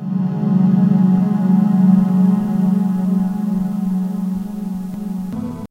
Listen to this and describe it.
A old synth pad from slowing down one of my electronic keyboard's sounds. I have no idea how I did it. (Pitch 2)